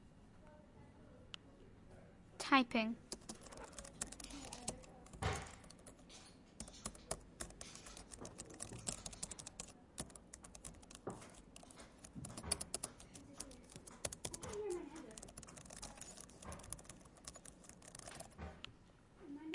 sonicsnaps GemsEtoy eloisetyping
Etoy, TCR